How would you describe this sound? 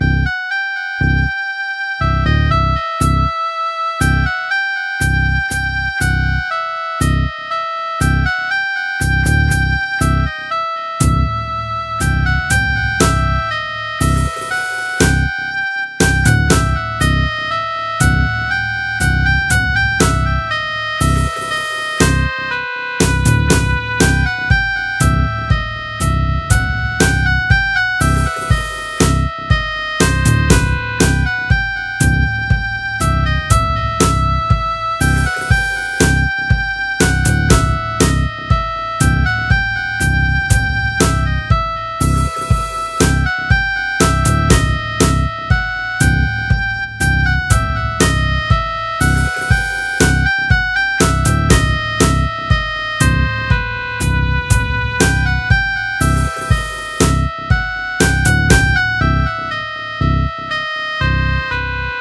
Desert background music
Background music I made for a game a few years ago, sadly it never got released so I decided to share it with everyone here!
This one was supposed to be used for a desert level.
Please show my name in the credits if you use my music.
And send me a message and link I'm excited to see what you used it for!